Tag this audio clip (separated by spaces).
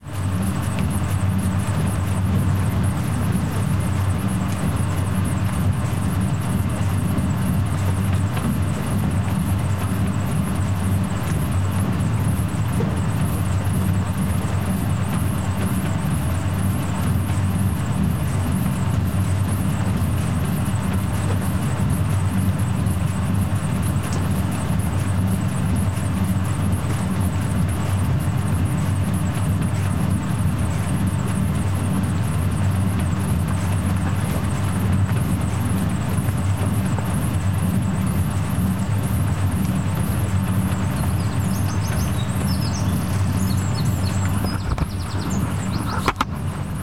engine machinery compressor Operation pump Hum machine generator industrial water motor mechanical